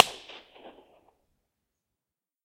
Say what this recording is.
niolon valley 2
popped a balloon in this valley in the National park Le Rove in South of France
Recorded on a zoom H2n in Mid Side mode
convolution, echo, impulse, impulse-response, nature, outdoors, reverb